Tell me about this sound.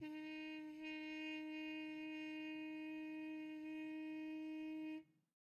One-shot from Versilian Studios Chamber Orchestra 2: Community Edition sampling project.
Instrument family: Brass
Instrument: Trumpet
Articulation: harmon mute sustain
Note: D#4
Midi note: 63
Midi velocity (center): 31
Room type: Large Auditorium
Microphone: 2x Rode NT1-A spaced pair, mixed close mics
Performer: Sam Hebert